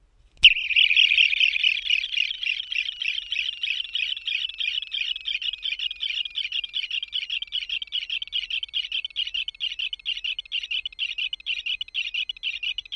scifi noise
Sci-fi sounding noise
abstract, alien, effect, electronic, foley, sci-fi, scifi, sfx, signals, sound-design, sounddesign, space, strange, weird